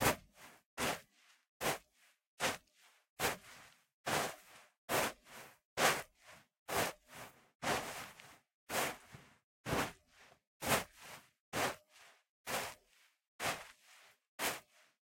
Footsteps Sand 3
Boots, Dirt, effect, Foley, Footstep, Footsteps, Grass, Ground, Leather, Microphone, NTG4, Paper, Path, Pathway, Rode, Rubber, Run, Running, Shoes, sound, Stroll, Strolling, Studio, Styrofoam, Tape, Walk, Walking